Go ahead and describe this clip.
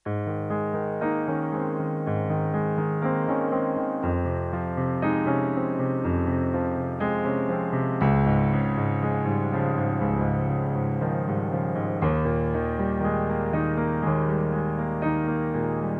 piano-loop in Aes major 1
16th piano-loop with chord-progression
loops, dark, piano, piano-bass, 120bpm, cinematic, 100bpm, Yamaha-clavinova